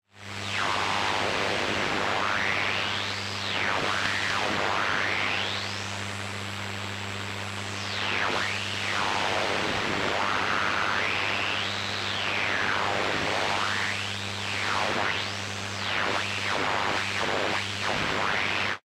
Radio Noise & Mod Rez 1
some "natural" and due to hardware used radio interferences
radio, interferences